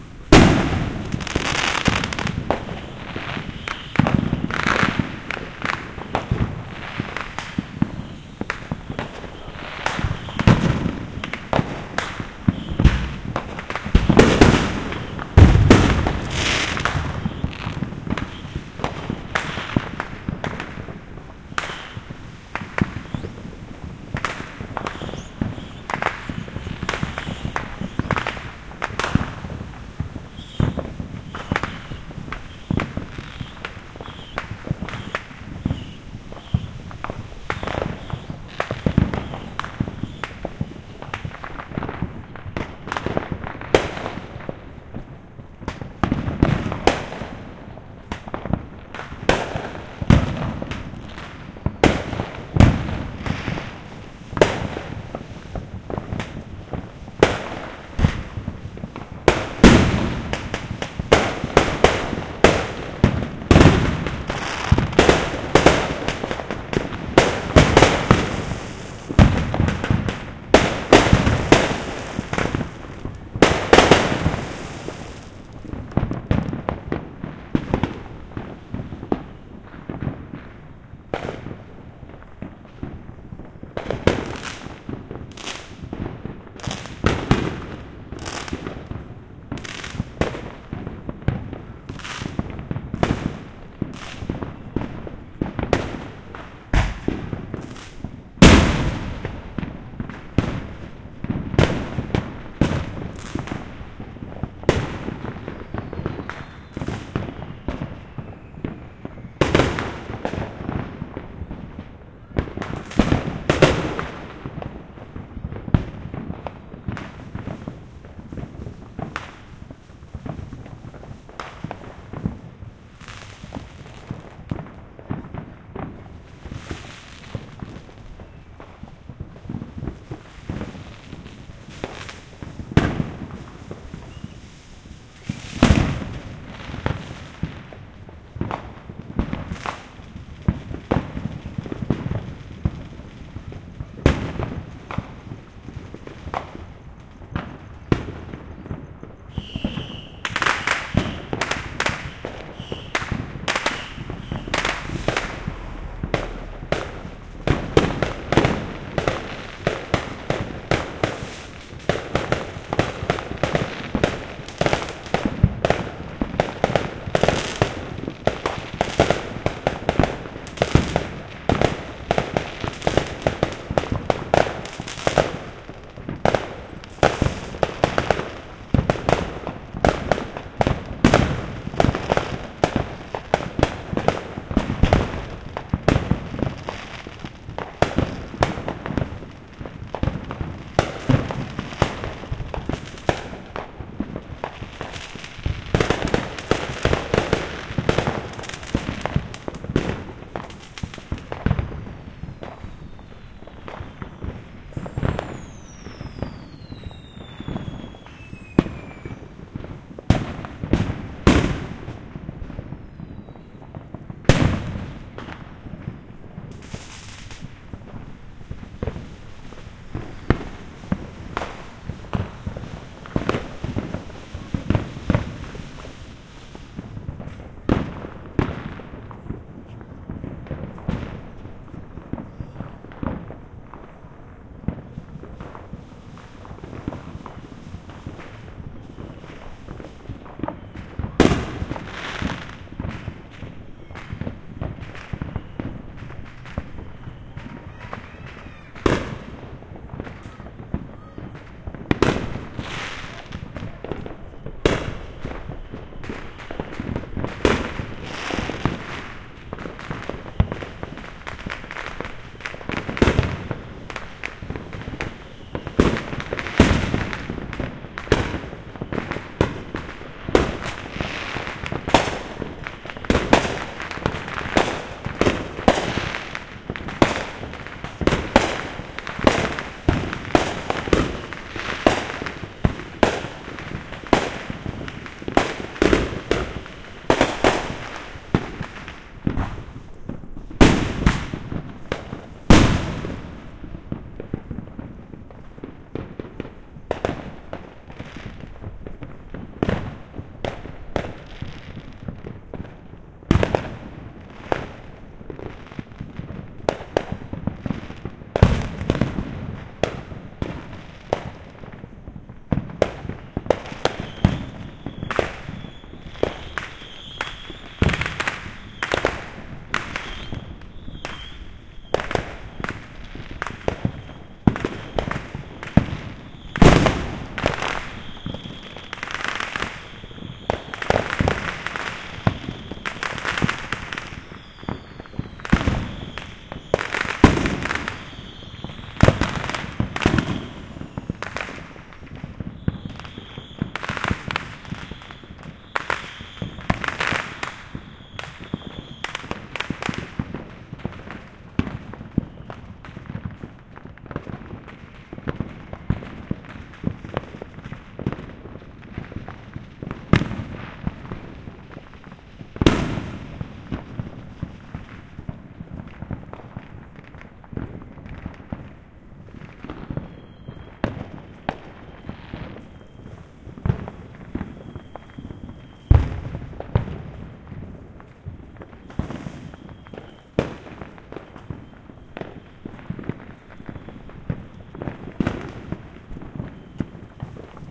Fireworks going off in various places within Santa Ana recorded with Roland CS-10EM Binaural Microphones/Earphones and a Zoom H4n Pro. No Post-processing added.
ambient, bang, binaural, binauralrecording, binauralrecordings, bomb, boom, exploding, explosion, explosions, explosive, fieldrecord, field-recording, fieldrecording, fire-crackers, firecrackers, firework, fire-works, fireworks, fourth-of-july, kaboom, loud, new-year, newyear, newyears, rocket, rockets